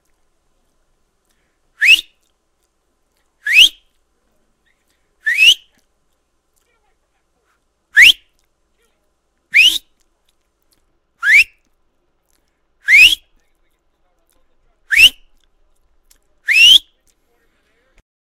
Whistle from lips
A series of whistles I performed on my Rhode NT2. These are whistles you would use to get someone's attention.
loud-whistle, whistle-lips, whistle-mouth